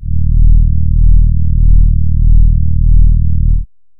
Warm Horn Ds1
An analog synth horn with a warm, friendly feel to it. This is the note D sharp in the 1st octave. (Created with AudioSauna.)